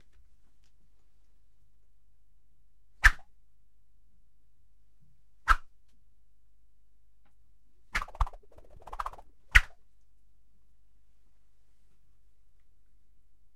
a serie of three WOOSH